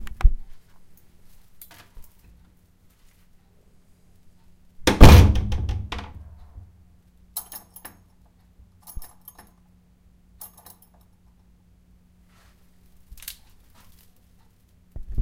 ZOOM0004-1 door downstairs
Recorded for STBB491, see pack description for link.
Shut the door downstairs at my inlaws. Keys were on the door and I gave them a slight jingle after shutting the door.
Recorded with a Zoom H1 internal mics fitted with windshield, 03-Aug-2016.
close, heavy-door, key, keychain, shut, slam, wooden-door